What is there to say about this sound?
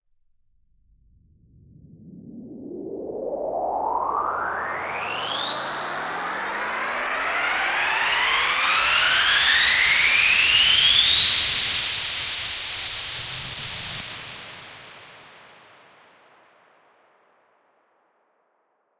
Riser Noise 04b
Riser made with Massive in Reaper. Eight bars long.